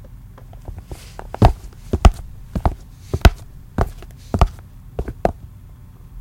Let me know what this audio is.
walking footsteps flat shoes tile floor 8
A woman walking on tile floor in flats (flat shoes). Made with my hands inside shoes in my basement.
tile, flat